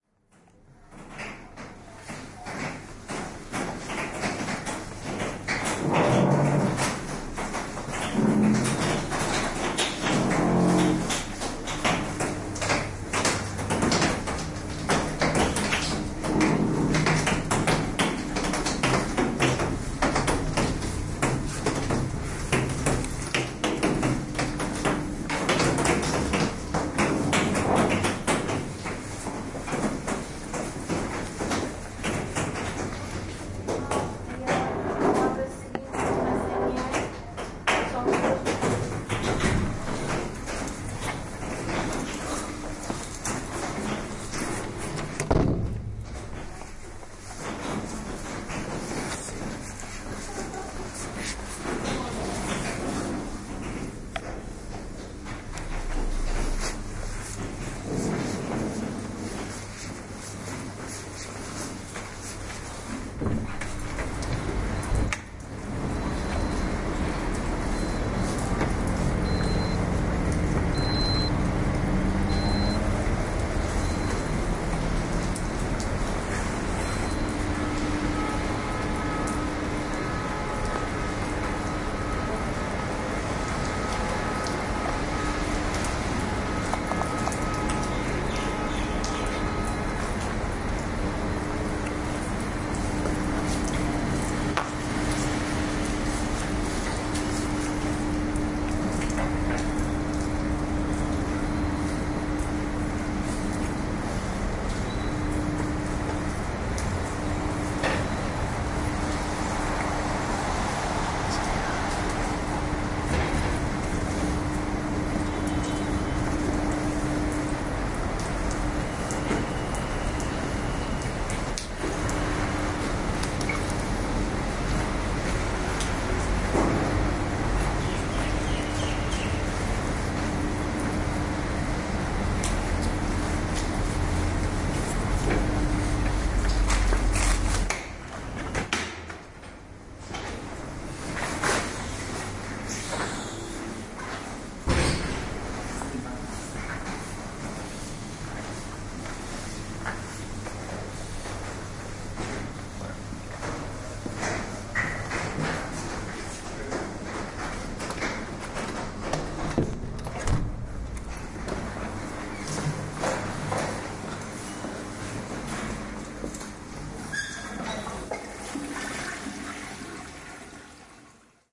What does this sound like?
Group 2 (2014) - Architecture Field Recording (USJ garden with rain)
Field Recording for the “Design for the Luminous and Sonic Environment” class at the University of Saint Joseph - Macao SAR, China.
The Students conducting the recording session were: Guilherme & Lego
Macau-Soundscape, NAPE-Garden-With-Rain